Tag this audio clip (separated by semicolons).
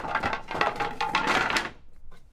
slats; throwing; dropping